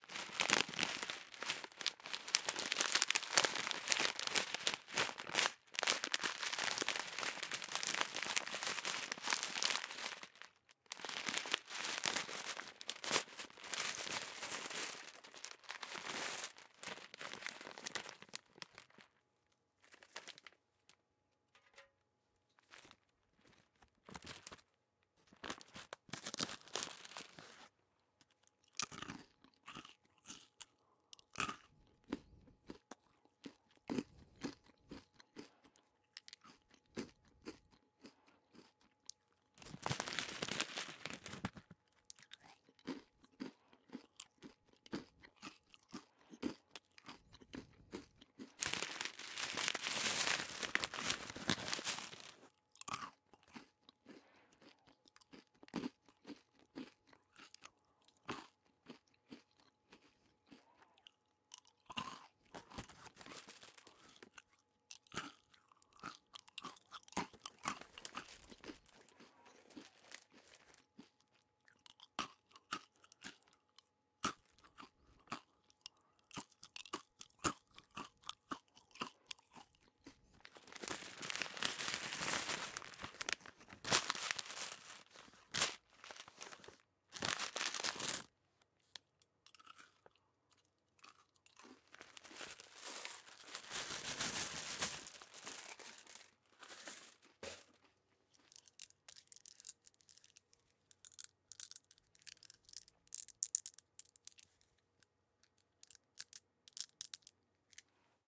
eating m&m's with eating- and packaging-noises